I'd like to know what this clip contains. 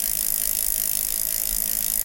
bike-loop
Loop of a spinning bicycle wheel.
Both are CC 0.